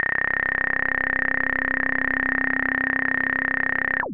Multisamples created with subsynth using square and triangle waveform.